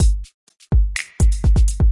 Part of the caribbean delights pack, all inspired by out love for dancehall and reggae music and culture.